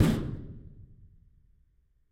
EQ'ed and processed C1000 recording of a metal door hits. I made various recordings around our workshop with the idea of creating my own industrial drum kit for a production of Frankenstein.